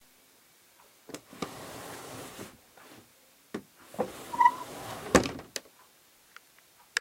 Smoothly and fairly quickly opening and then closing a drawer.